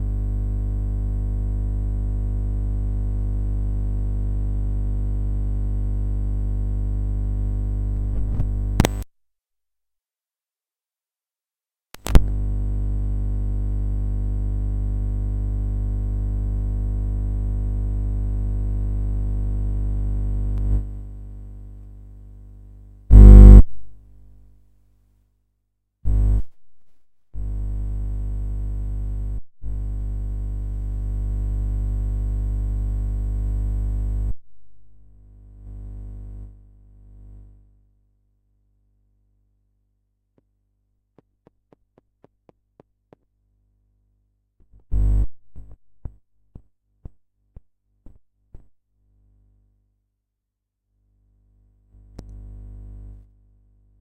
Guitar Jack Noises RAW
Sound of a guitar cable patched into my soundcard and then touched with my hands creating a ground loop sound.
This was recorded during the first quarantine period in Italy when stuck at home with a SM57 and a Focusrite Scarlett 2i2.
AC, buzz, current, electricity, ground, noise